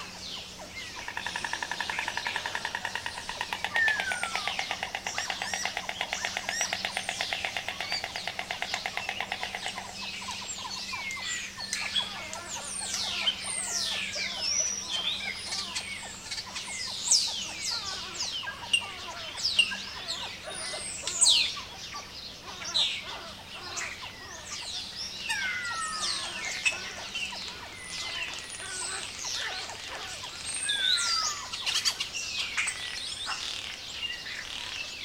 20060426.house.storks.01
White Storks in a nest, near a house in the marshes. Sennheiser ME62 into iRiver H120. Doñana National Park /sonido de cigüeñas anidando en una casa en las marismas
insects, nature, white-stork, field-recording, spring, donana, pond, birds, marshes